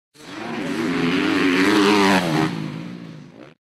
two 250f honda motorcycle going by.